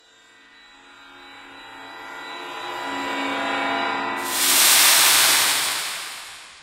Used a sound of a car going past and a synthesized shake of rice to create the D&D spell Misty Step. Very useful for that Horizon Walker Ranger who uses this every battle!